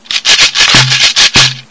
Hitting a shekere
with the palm of hand. Recorded as 22khz
handmade, gourd, shaker, invented-instrument